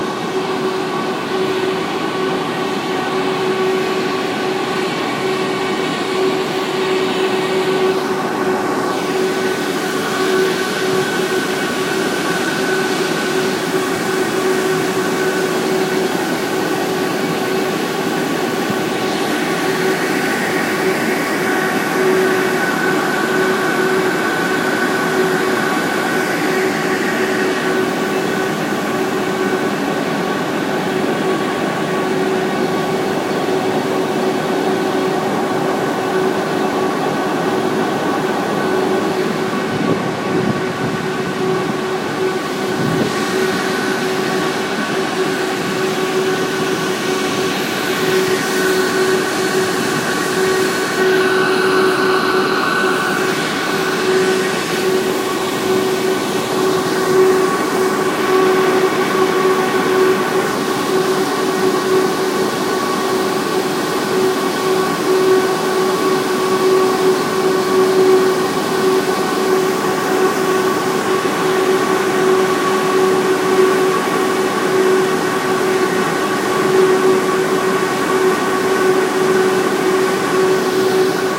Loud Fan at Rotterdam Blaak Trainstation
Heard a really loud fan noise when I was looking for my night train at Rotterdam Blaak trainstation. Looked it up and recorded it with my iPhone 4.